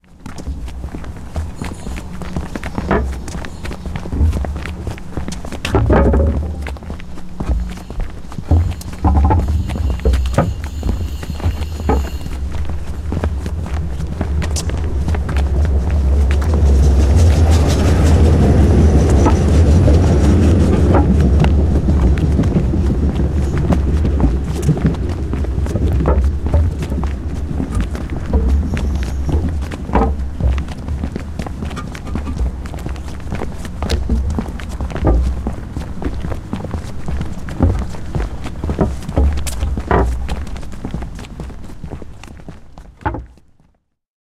Footsteps along the concrete path and a passing tram
walk, steps, walking, city, footsteps